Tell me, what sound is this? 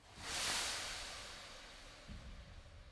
In a way this hit sounds like a relieving sigh.